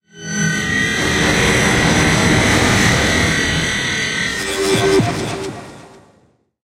Sci-fi sound effects (16)
Sound design elements.
Effects recorded from the field of the ZOOM H6 recorder,and microphone Oktava MK-012-01,and then processed.
Sound composed of several layers, and then processed with different effect plug-ins in: Cakewalk by BandLab, Pro Tools First.
I use software to produce effects:
Ableton Live
VCV RACK 0.6.0
Pro Tools First
cinematic, atmosphere, woosh, background, glitch, moves, game, destruction, dark, transition, impact, scary, opening, abstract, horror, metalic, Sci-fi, transformation, transformer, futuristic, rise, noise, hit, morph, drone, metal, stinger